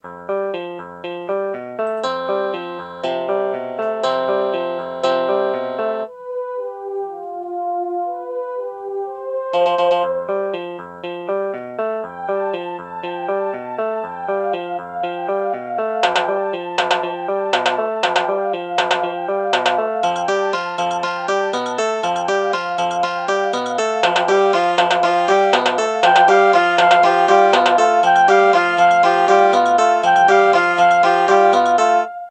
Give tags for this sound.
music midi

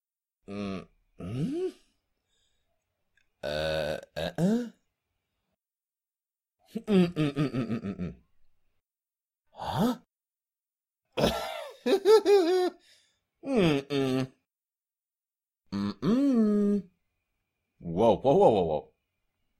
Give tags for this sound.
wordless puzzlement vocal bewilderedness man male befuddlement voice distraction perplexity confusion human mystification bafflement

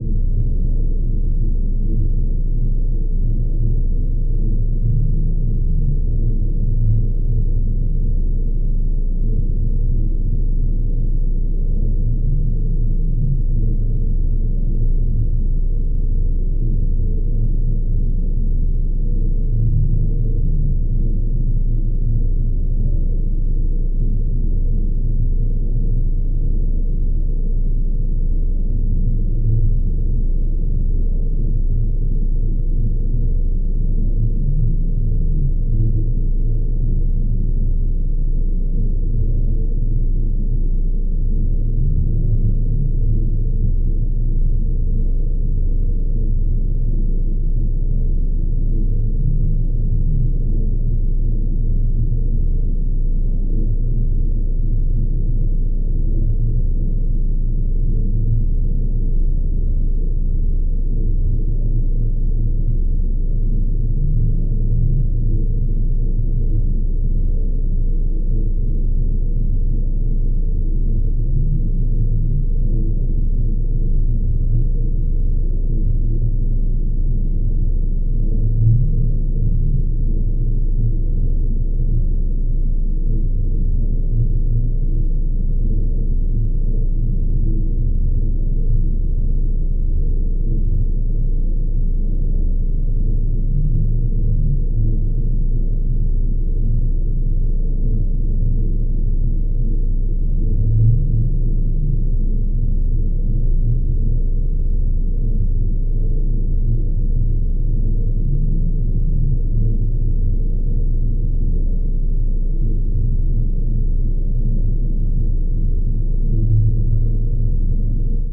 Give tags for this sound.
artificial Atmosphere drone industrial pad skyline smooth soundscape subsonic

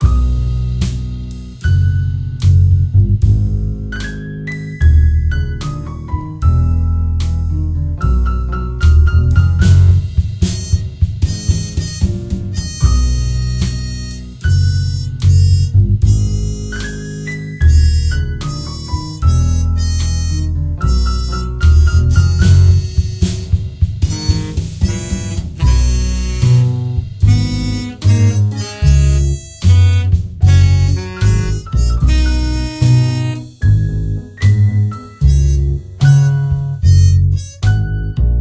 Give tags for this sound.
game Jazz jazzy music videogamemusic

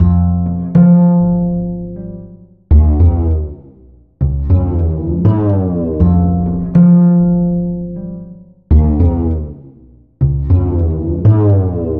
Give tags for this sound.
sliding bass loop

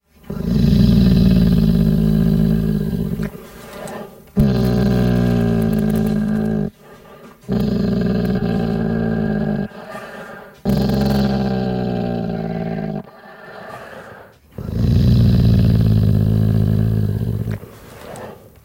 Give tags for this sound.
dog growl growling menacing mono

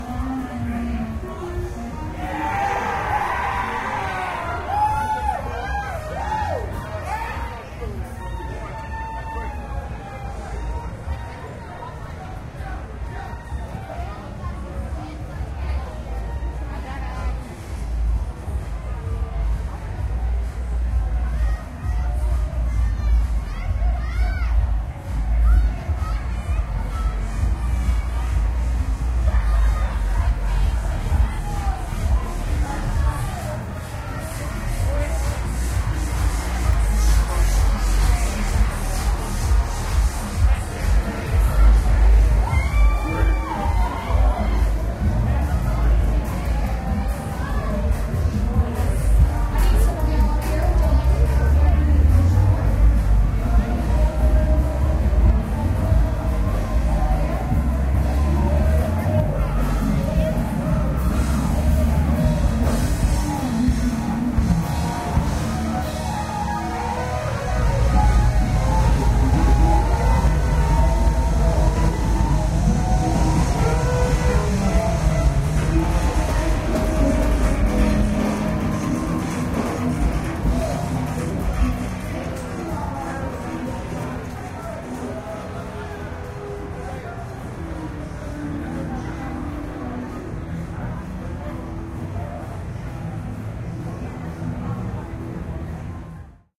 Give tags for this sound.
cheering club dance-music drum-fill drunk field-recording guitar-riffs jazz party saxophone shouting yelling

